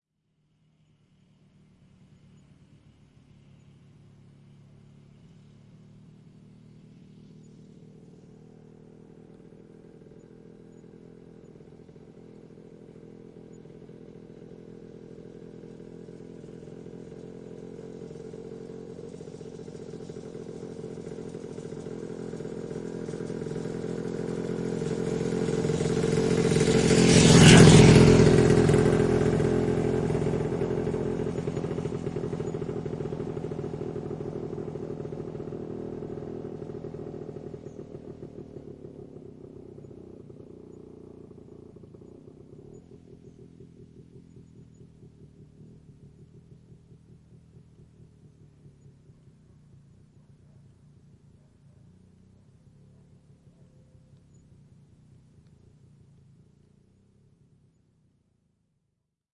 Moottoripyörä, vanha, ohi soralla / An old motorbike, passing by slowly on gravel, exhaust rolling, Jawa, 250 cm3, a 1956 model
Jawa, 250 cm3, vm 1956. Lähestyy soratiellä, ajaa ohi, etääntyy.
Paikka/Place: Suomi / Finland / Kitee / Kesälahti
Aika/Date: 20.08.1988
Tehosteet, Field-Recording, Motorcycling, Soundfx, Finland, Yleisradio, Suomi, Motorbikes, Finnish-Broadcasting-Company, Yle